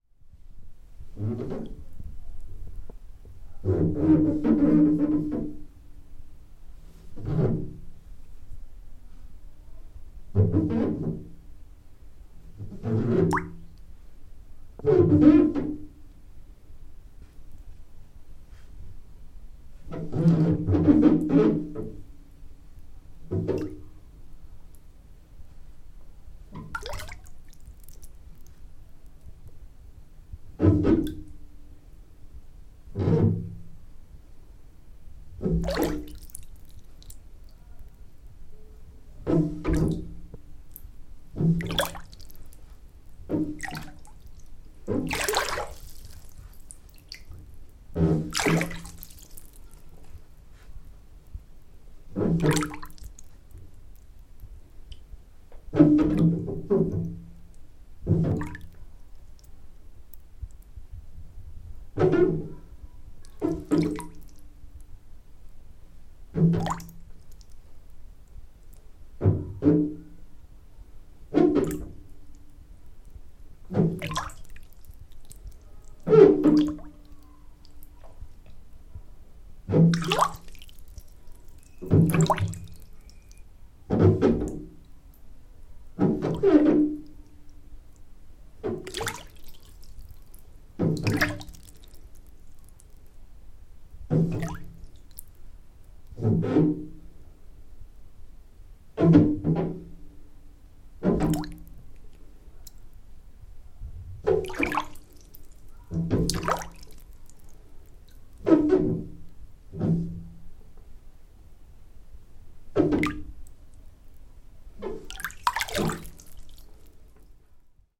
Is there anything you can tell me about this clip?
Wasser - Badewanne voll, Bewegung
Filled bathtub with movement sounds
bathtub, field-recording, filled, movement